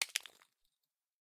Peanut drop 5
Dropping peanuts onto the concrete floor in my garage. Recording with my ZOOM h4n.
Peanut, drop, small-object